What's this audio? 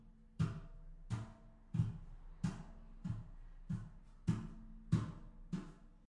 golpes de pie en un escalon de metal